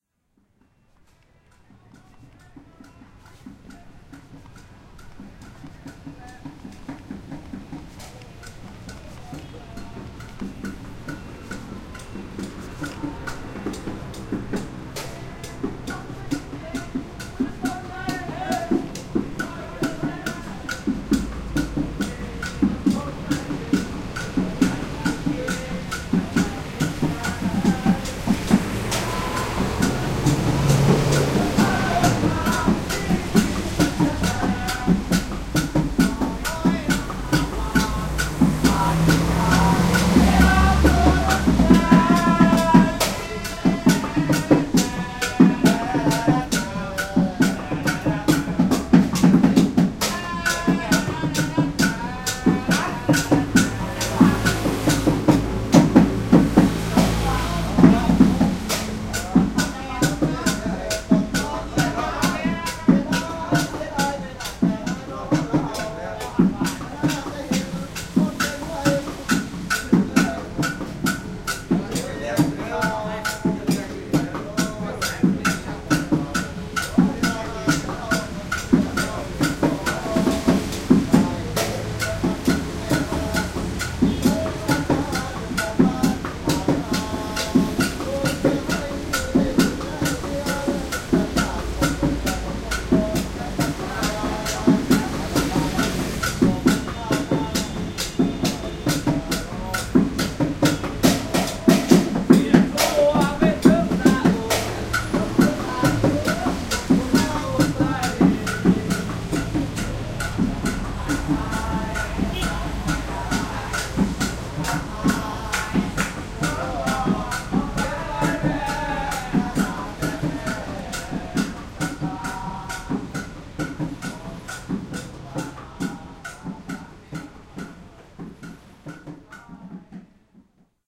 A loose jam (by whom I'm guessing are Indonesian laborers) in a temporary compound next to the Sungshan Train Station. Their music was loud & clear; coming from behind a tall corrugated metal wall. No people were visible. Raw.
2009, singing, field-recording, taiwan, city, walk, drumming, h2, taipei